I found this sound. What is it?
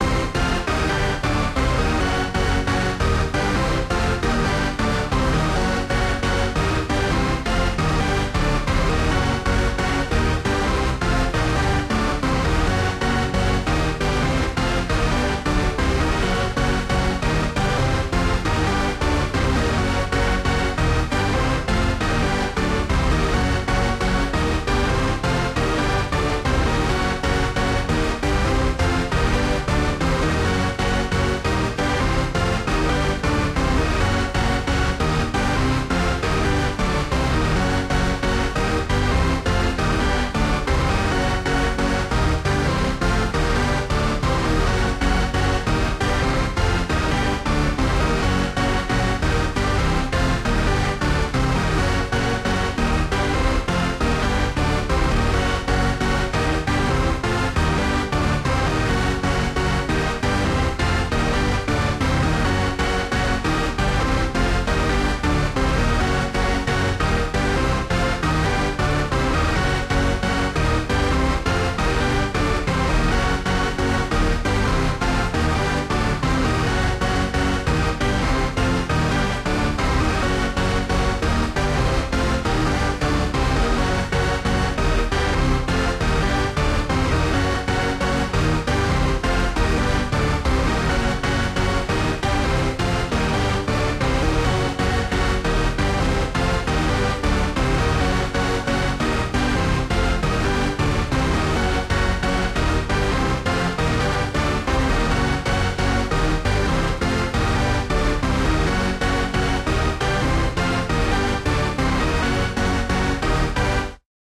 Freeze 1-vs-1-classic1706161432
Another VS-1 stem, frozen in Live 9, part of a failed project, with a bit of processing on top of it, I thought why the hell not share this btch.
rave, vsti, chord, vs-1, retro, lead, synth, electronic, loop, free